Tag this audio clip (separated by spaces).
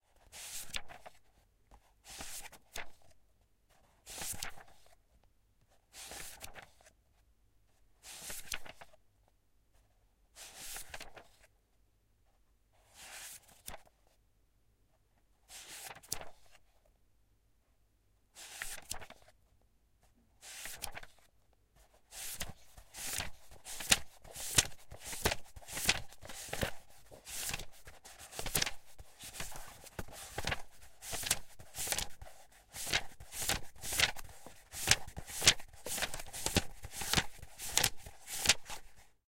flip book fast turn flipping pages page